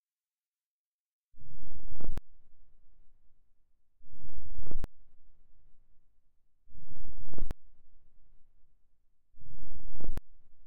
They have been created with diverse software on Windows and Linux (drumboxes, synths and samplers) and processed with some FX.